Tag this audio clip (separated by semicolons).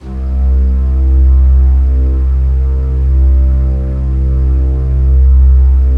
c1; flute; pad; stereo; swirly